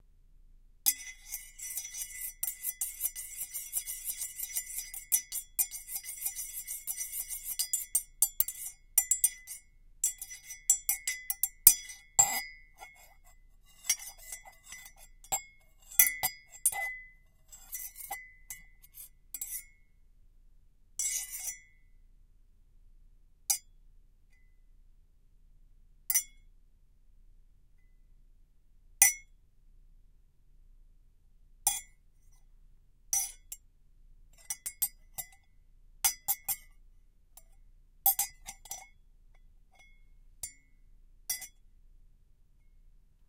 COFFEE MUG CLANKS
-Coffee mug strikes and clanks